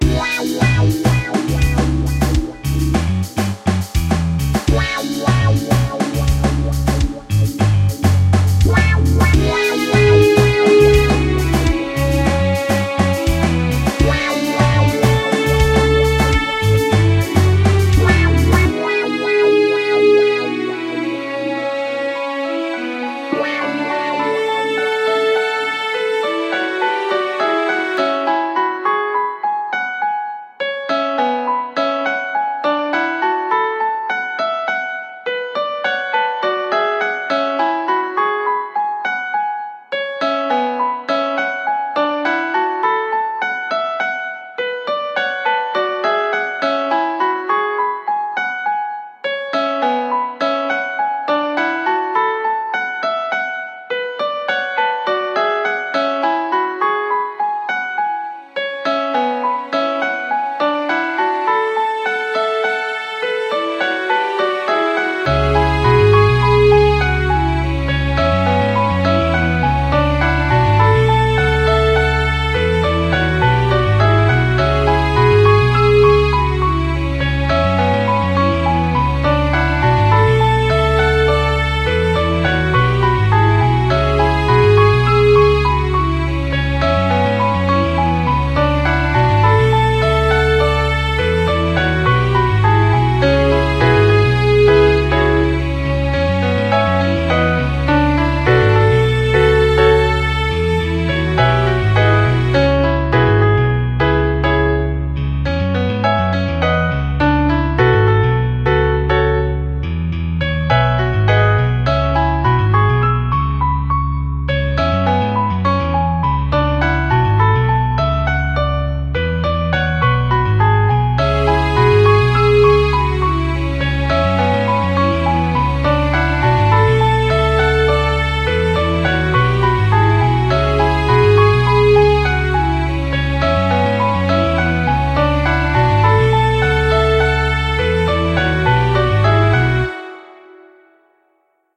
sexy funk + strings & piano
It starts as sexy funk, turns into sad piano, and goes back to a mixture of both. Is this something people crave for? I don't know.
This is a part of a song from a soundtrack (51 songs, 2+ hours of music) I made to The Legend of Zelda - Ocarina of Time. Yes, that game already has a soundtrack, but I made a new one.